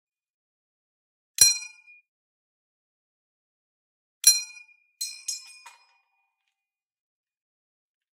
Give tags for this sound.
firearm gun m1 m1garand ping

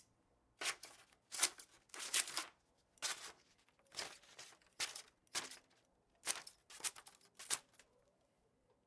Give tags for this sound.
Paper Scissors

